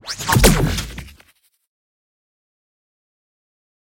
SciFi Gun - Plasma Slinger
Plasma, Pulse, Laser, Weapon, Ball, Gun